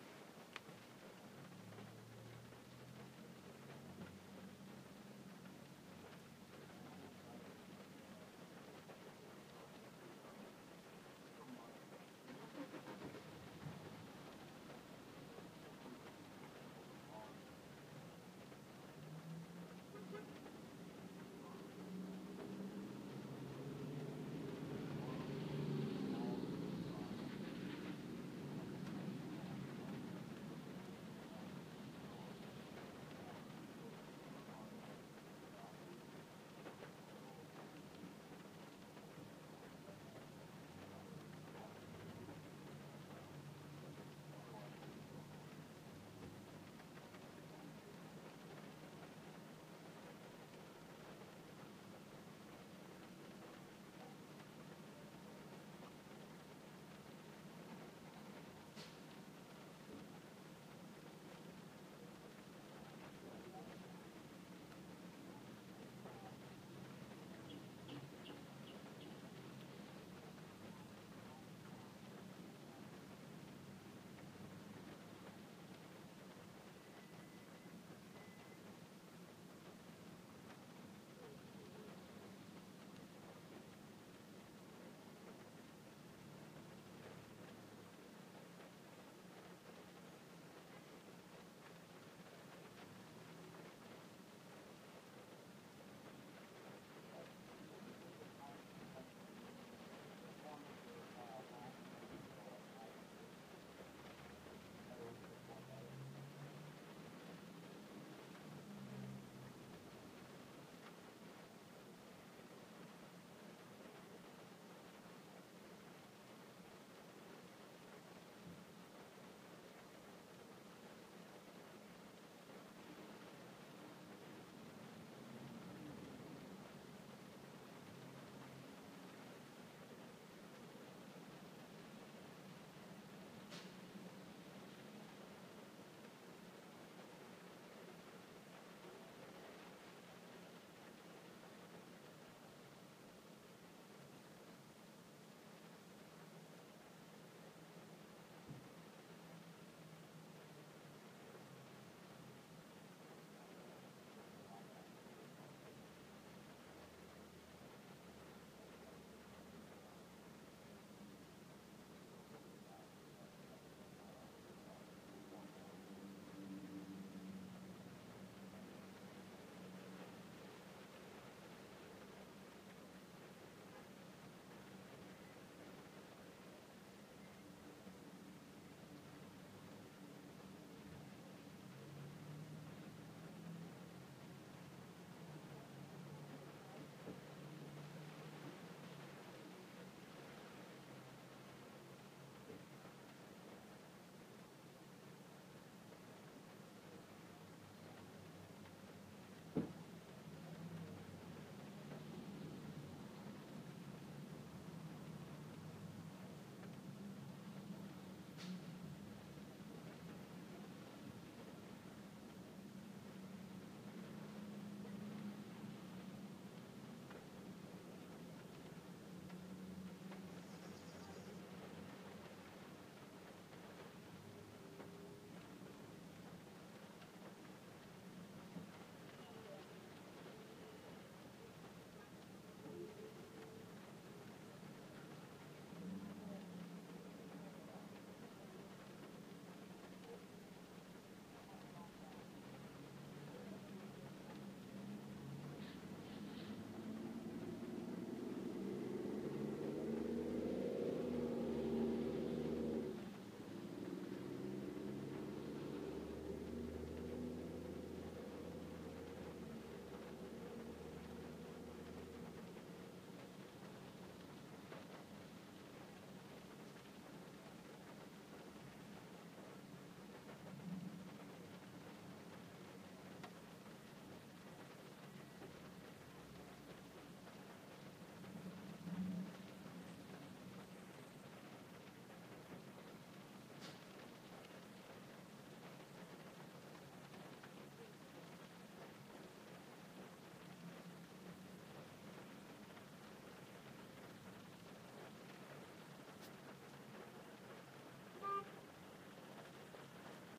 Interior Car Rain In Busy Parking Lot
Early morning rain heard inside of a car in a busy parking lot. Sounds of people walking past, car doors opening and closing, cars pulling in and out, distant traffic on the surrounding roads going by including cars, trucks and busses. Occasional honks. Occasional audible talk-radio in a car parked near by.